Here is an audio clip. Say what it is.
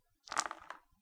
Dices throw02
5 Dices thrown on a playboard
Game, Throw, Dice